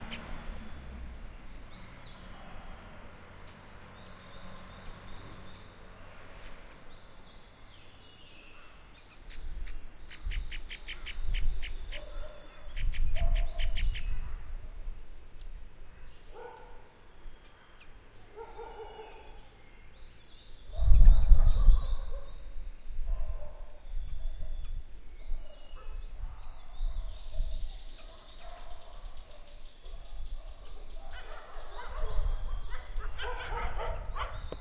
forest-bird (1)
Birds in the forest in the morning in the mountains of Darjeeling, Bengal